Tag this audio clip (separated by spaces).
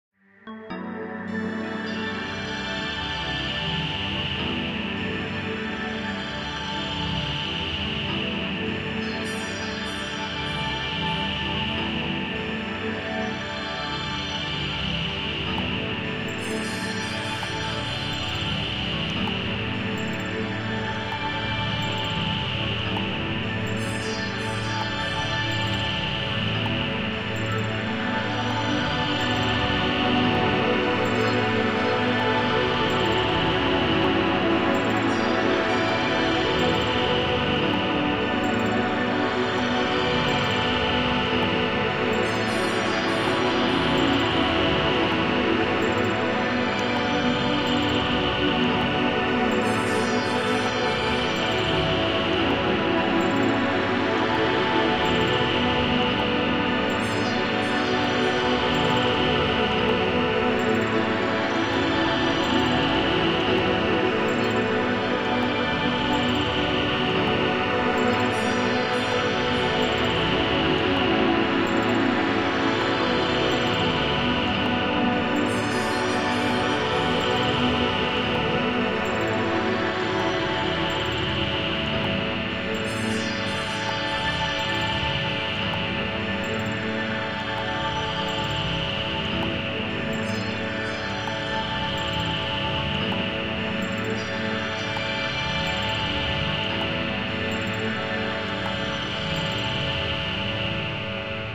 ambient pad dreamy chill